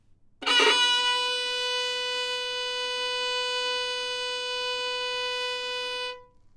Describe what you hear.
Part of the Good-sounds dataset of monophonic instrumental sounds.
instrument::violin
note::B
octave::4
midi note::59
good-sounds-id::2563
Intentionally played as an example of bad-attack-pressure